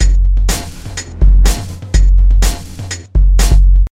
Breaks Fat Hammer Beat 03
big beat, dance, funk, breaks
beat, big, breaks, dance, funk